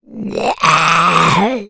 A voice sound effect useful for smaller, mostly evil, creatures in all kind of games.
creature, gamedev, Voices, gaming, small-creature, indiedev, minion, games, sfx, arcade, RPG, vocal, fantasy, Talk, game, indiegamedev, goblin, videogame, imp, Speak, gamedeveloping, voice, videogames, kobold